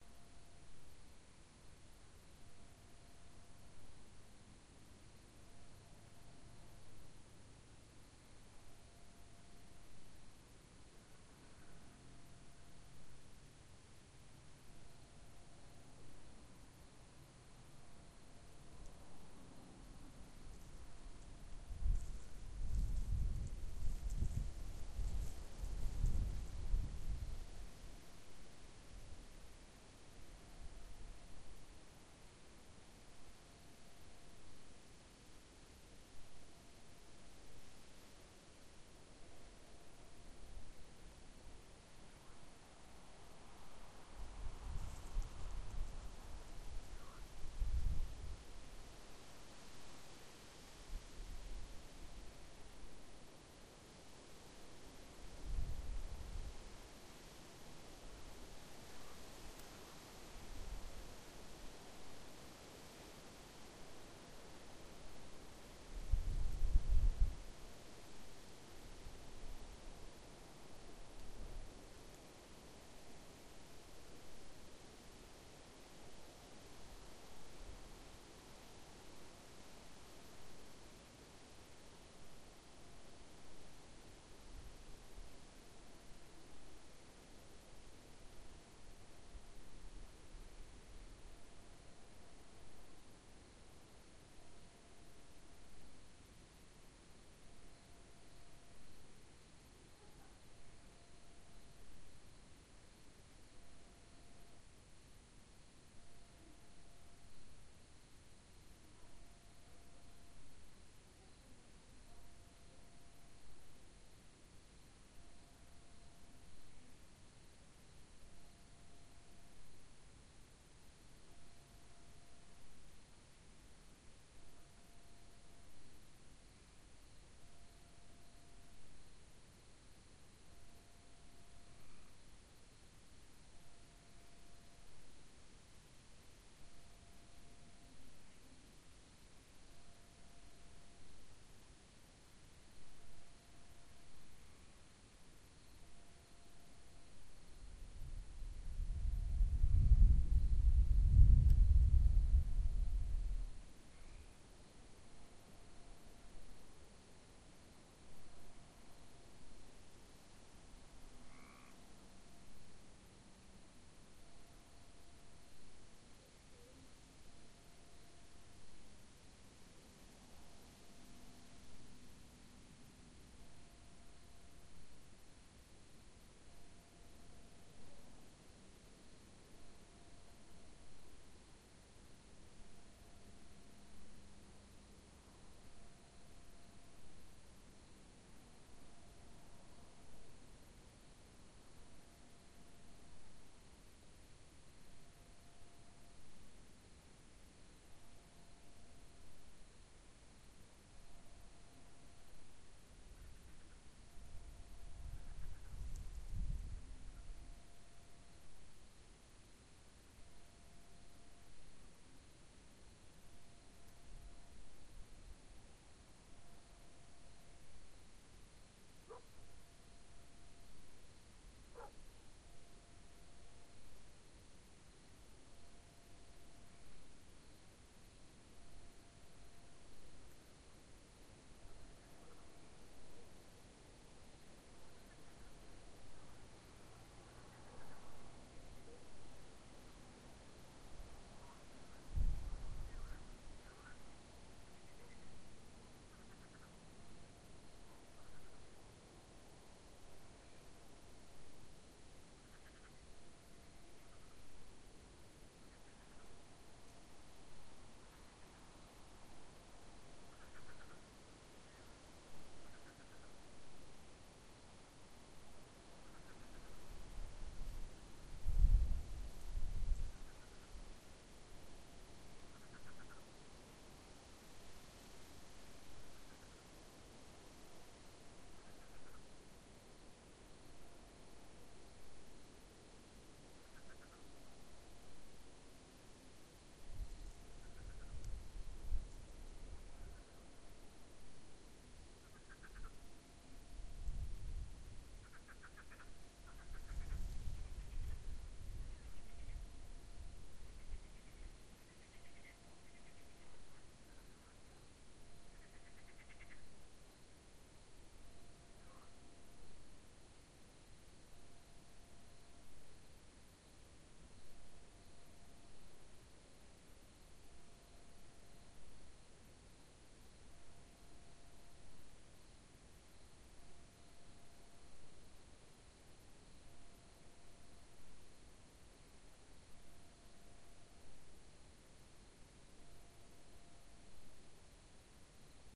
Thunder Frogs Dog
Distant thunder, frogs croaking, some dog barking out into the night.
ambient, field-recording, nature